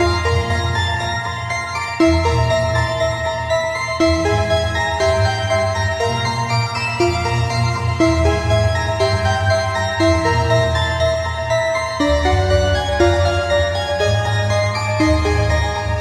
short loops 26 02 2015 2
made in ableton live 9 lite
- vst plugins : Alchemy
- midi instrument ; novation launchkey 49 midi keyboard
you may also alter/reverse/adjust whatever in any editor
gameloop game music loop games dark sound melody tune techno pause
dark, game, gameloop, games, loop, melody, music, pause, sound, techno, tune